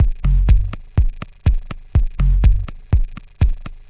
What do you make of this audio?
Addon loop 3-123 bpm
addon, minimal